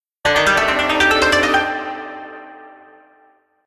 Koto Hit
Short Koto Melody I created out of boredom.
Done with DSK Asian DreamZ in FL Studio 10
Melody, Hit, Martial-Arts, Zen, Japanese, Koto